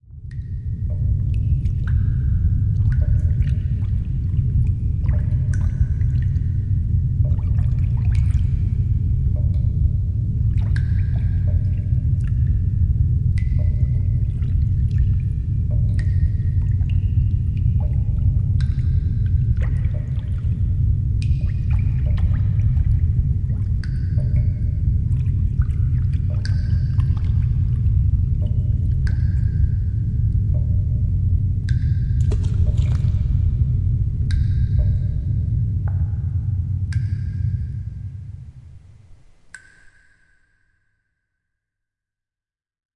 Wastewater with Water dripping
this sound made by 7 different tracks, 2 different water dropping tracks and 1 Lake Waves and 4 noise ambiance with EQ and big room reverb
Water-dripping, general-noise, white-noise, ambiance, atmo, sullage, background-sound, Waves, field-recording, Lake, horror, movie-fx, ambience, atmospheric, Wastewater, noise, background, fx, soundscape, atmosphere, atmos, ambient